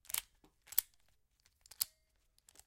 Lock release
unlocking a master lock
key, lock, spring, unlocking